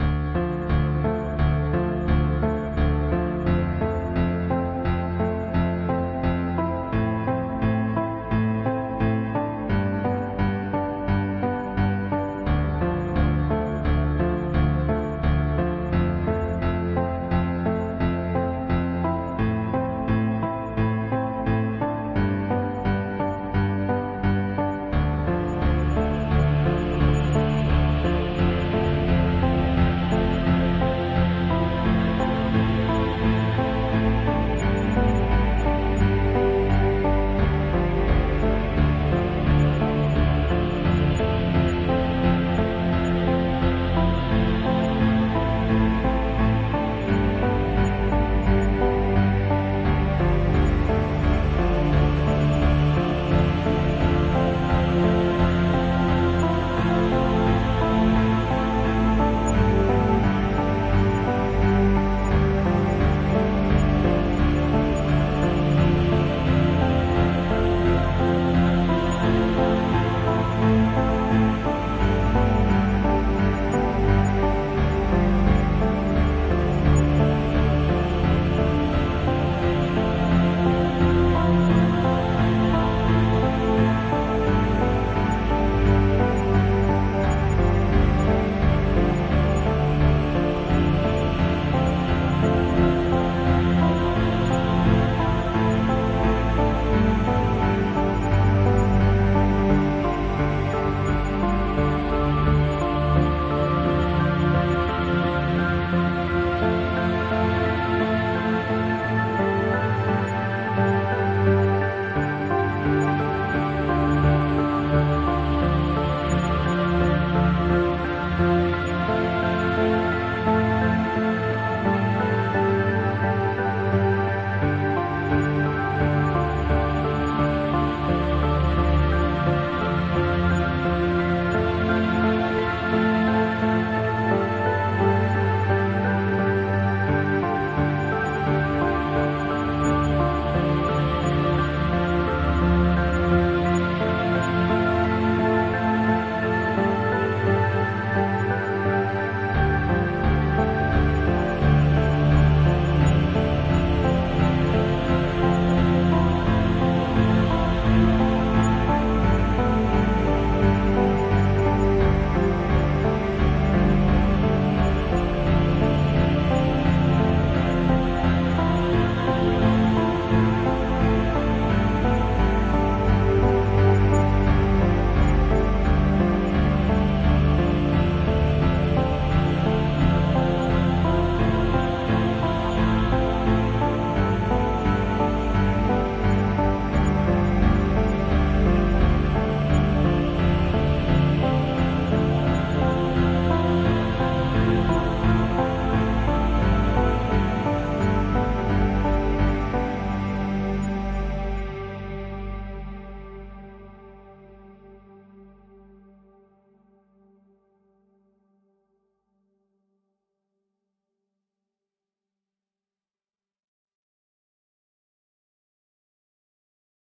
Cello, Chior and Piano